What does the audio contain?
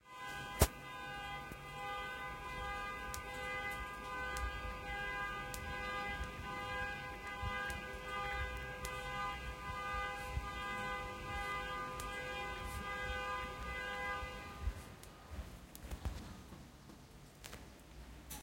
Car alarm with some clicks and distortion added
alarm, car, clicks